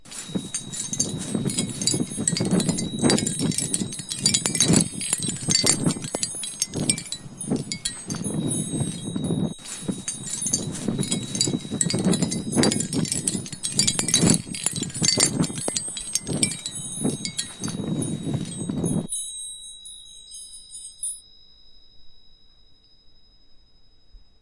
A cloud of glass dust is expelled into the air. Created for The Iron Realm Podcast.
chimes, dust, glass, sharp